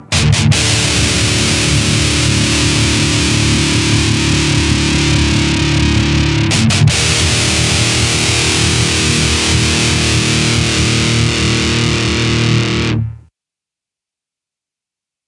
DIST GUIT 150BPM 7
Metal guitar loops none of them have been trimmed. they are all 440 A with the low E dropped to D all at 150BPM
DUST-BOWL-METAL-SHOW, REVEREND-BJ-MCBRIDE